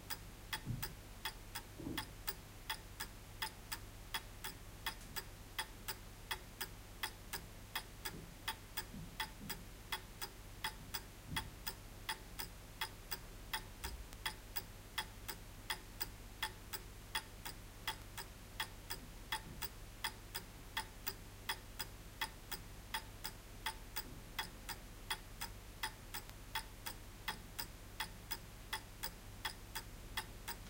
To test some microphones I used the following setup:
Quadmic preamplifier with HiGain on , set on a medium setting.
iRiver IHP-120 recorder, Gain on 20. (rockbox)
Distance clock to microphone: 30 cm or 1 ft.
In the title of the track it says, which microphone was used and if Phantom power or the battery were used.
Here: Sennheiser MKE 66.